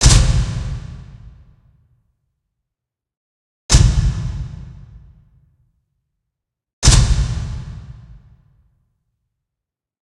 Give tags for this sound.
artificial; breaker; cinematic; circuit-breaker; effect; electric; film; fx; game; impact; lights; light-switch; machine; mechanical; movie; neon; projector; sfx; shutdown; sound-design; sounddesign; soundeffect; spotlight; starter; start-up; switch; toggle; tumbler-switch

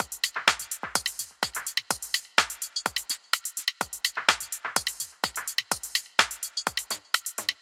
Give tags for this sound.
filter; Drum; FX

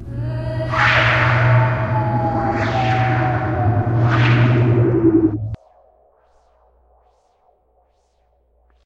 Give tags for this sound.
wolf; ufo; experimental; alien; suspense; sci-fi; howl

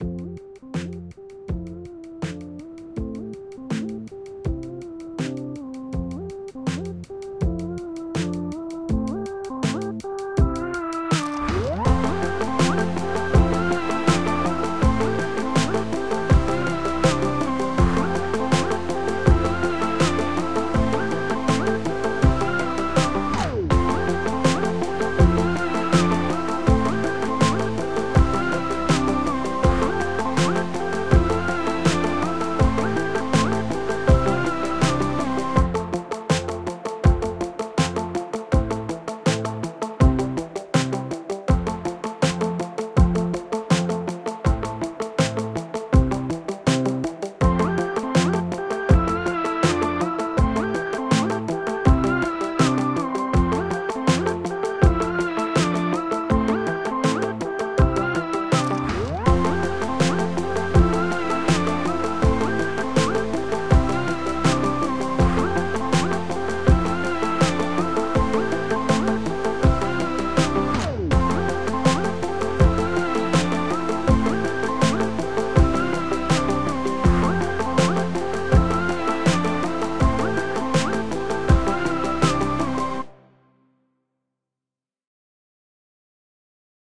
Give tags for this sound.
130-bpm; beat; beats; garbage; groovy; hopeful; loop; loops; percs; percussion-loop; quantized

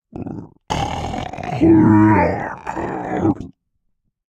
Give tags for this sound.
beast; creature; growling; grunting; human; monster; non-verbal; slurping; snorting; vocal; voice; voiceover

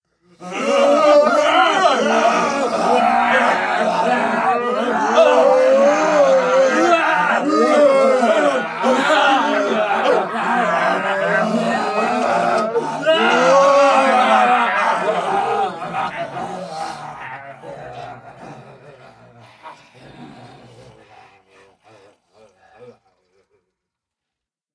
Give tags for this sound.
creepy; scary; terror; zombies; terrifying; horror

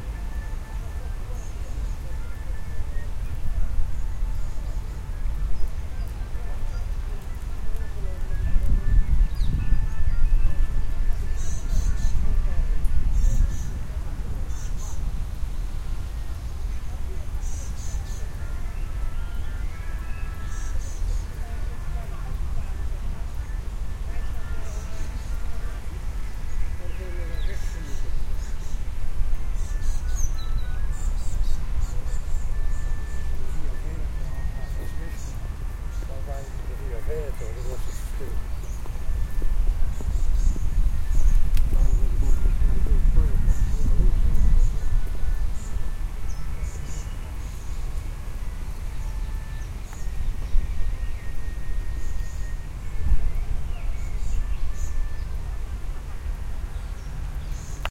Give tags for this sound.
Rome; Ambience; Park

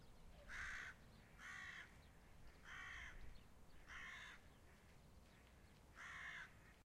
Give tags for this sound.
bird; raven; field-recording; crow; nature

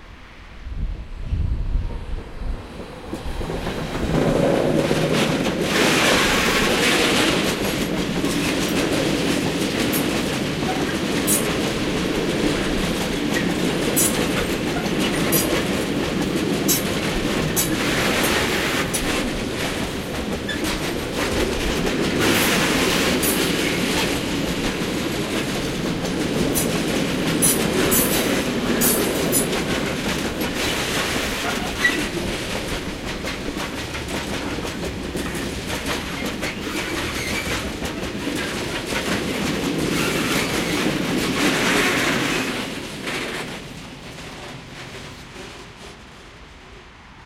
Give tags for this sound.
railway; rail; transport; rail-road; train; freight-train; rail-way; cargo-train

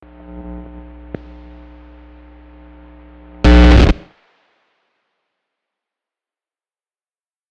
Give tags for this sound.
Guitar; noise; plug; static

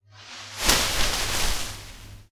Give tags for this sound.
shrubbery; bushes; bush; leaves; leaf; noise

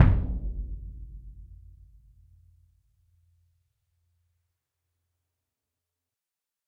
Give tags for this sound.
bass; concert; orchestral; symphonic

